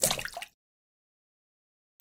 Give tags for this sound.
aqua; aquatic; bloop; blop; crash; Drip; Dripping; Movie; Run; Running; Water; Wet

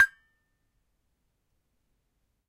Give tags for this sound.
metallophone; hit; percussive; metal; gamelan; metallic; percussion

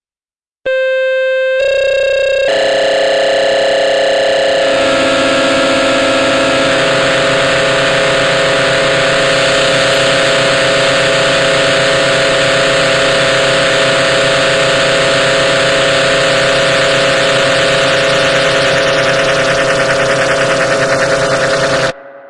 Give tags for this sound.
Building-tension; Fear; Horror; Scary

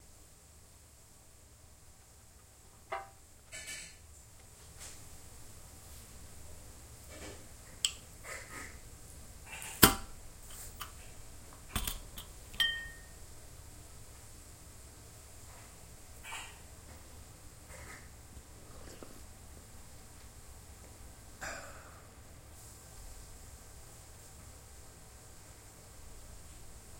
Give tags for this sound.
Mensch; Nebengerusche; Trinken

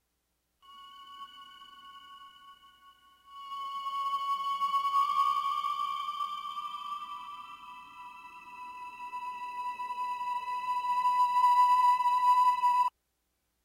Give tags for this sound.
FX; Pads; Sound-effects

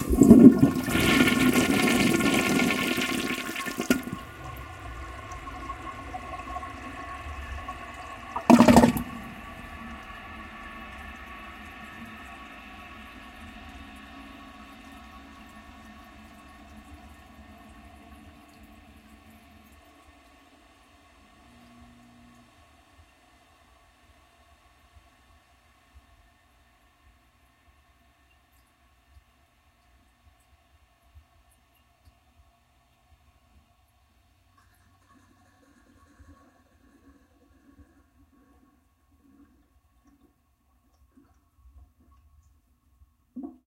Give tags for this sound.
flush; glug; gurgle; toilet; water; wet

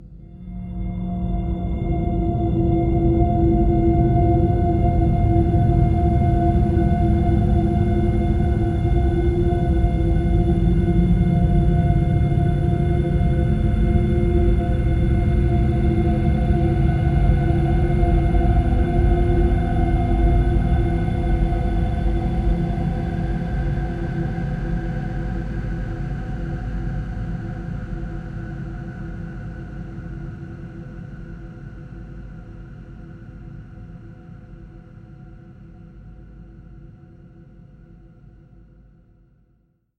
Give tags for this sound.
cinimatic; cloudy; multisample; pad; soundscape; space

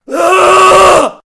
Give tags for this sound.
666moviescreams; NT2-a; Rode

